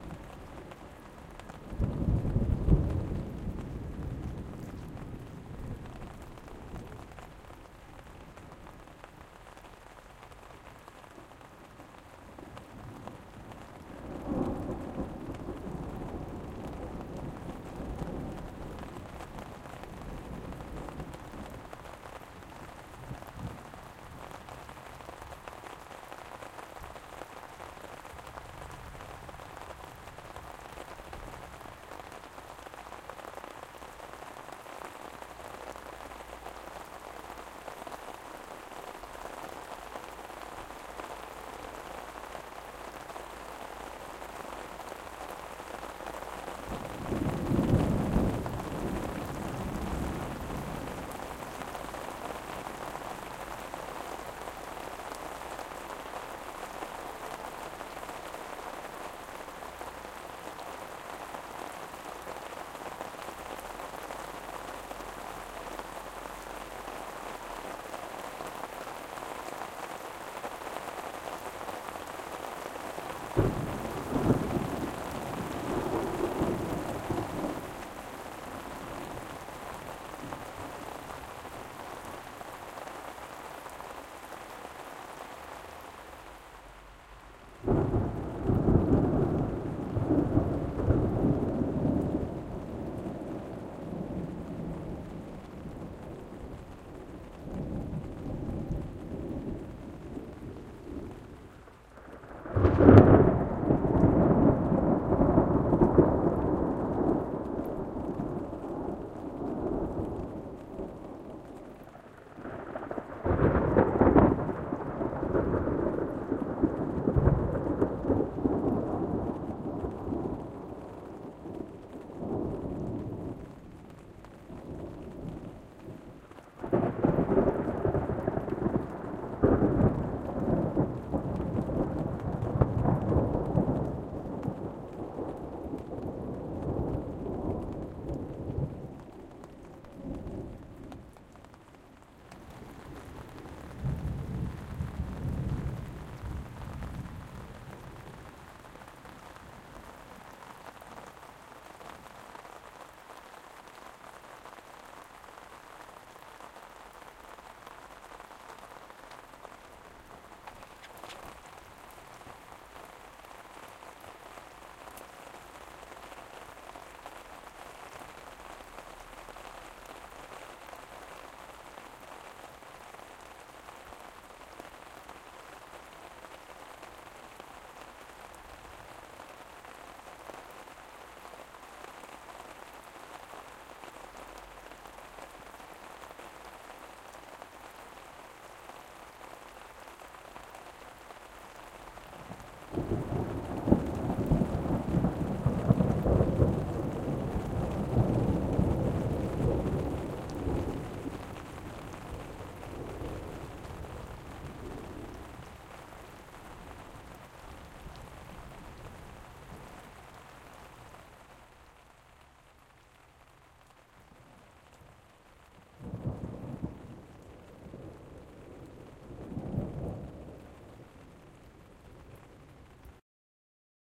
Recording of rain & thunderstorm in Muszyna taken in a restaurant's patio at main square in Muszyna, Poland.